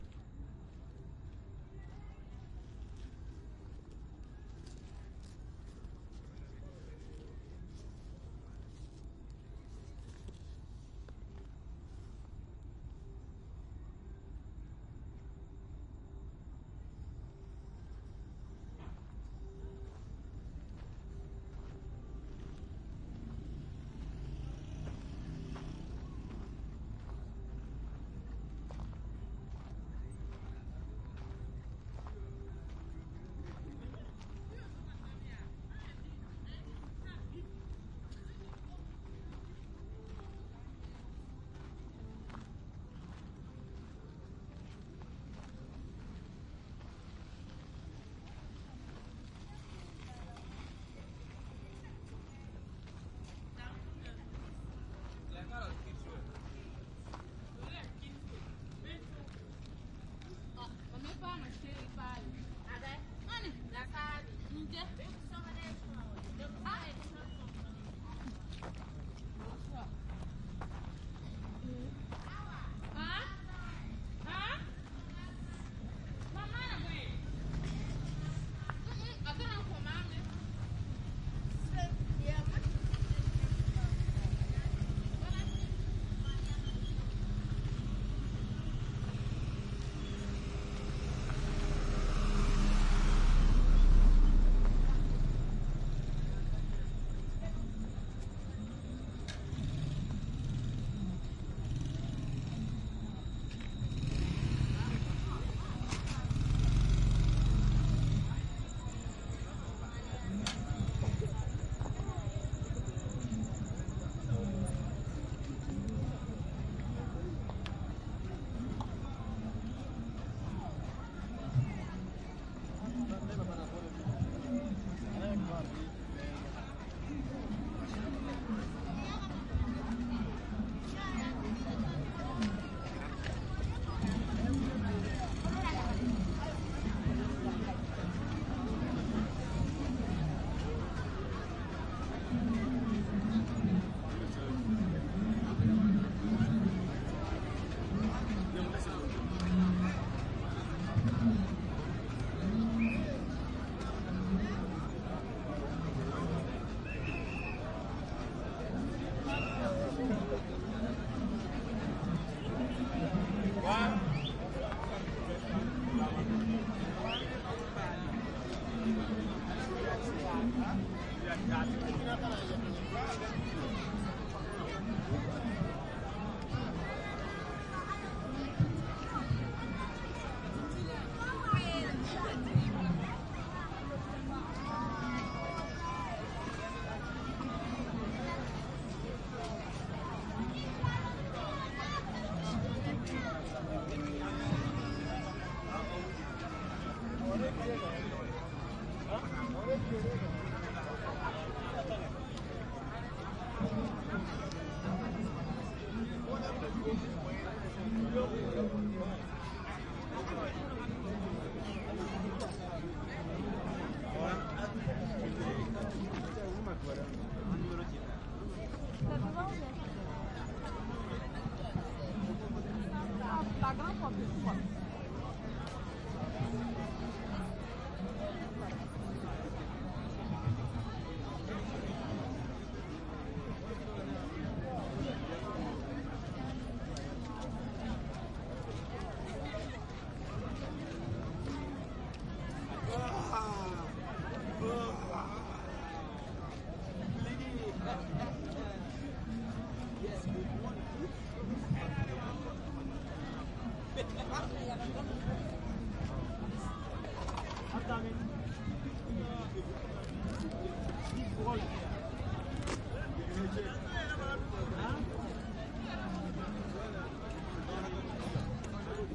trav place femme
I'm walking at night to reach the " Woman Place " in Ouagadougou Burkina Faso.
Nagra IVs, Schoeps MK5
africa, Countryside, Field, Recording, street, Travel, walk